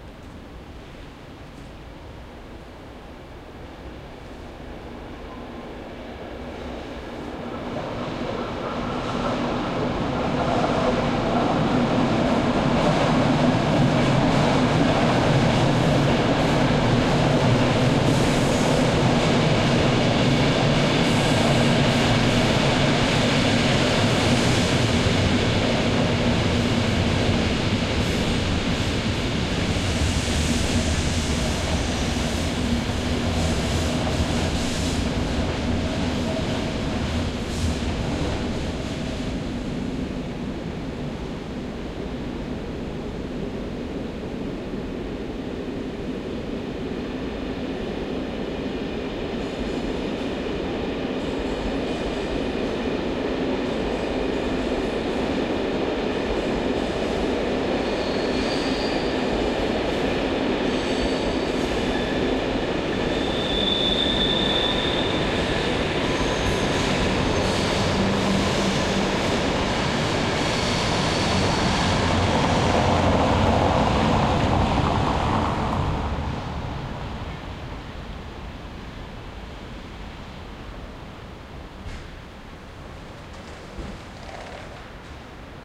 Trains pass by at night. At this late hour they do not stop here anymore but go on to their final destination. A car goes by. Recorded with a Pearl MSH 10 MS stereo mic via Sound Devices 302 field mixer to Sound Devices 702. Coded to L-R stereo at the mixer stage. 2dB EQ on highs and compression. Oh, Pearl Microphone Laboratory of Sweden have nothing to do with Pearl, the percussion instruments maker.